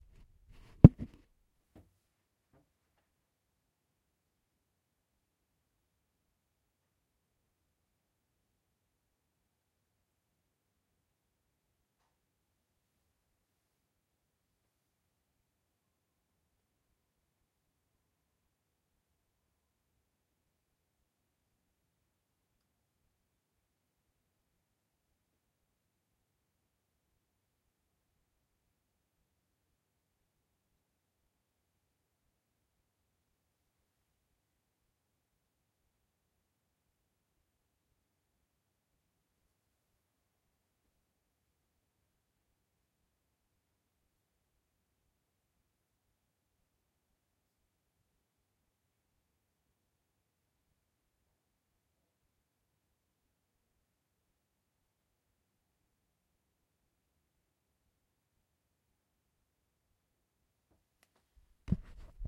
This is a silent room. Yes, I realise that seems a bit odd, but sometimes it's good to have a realistic silence as opposed to a dead 'lack of sound'.